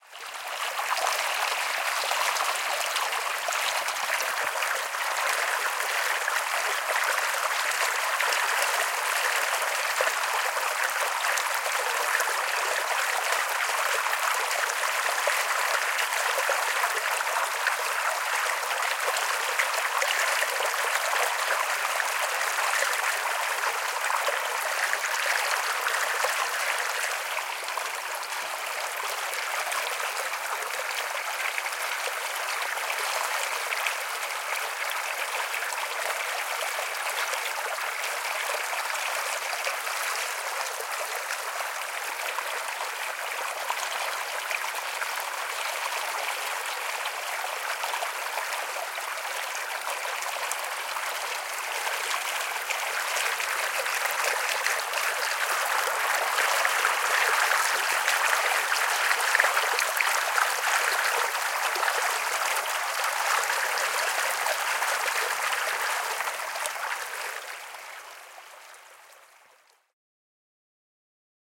Water of a pool
Ambience sound effect of water coming out from a pool;
design, pool, ambience, sound, water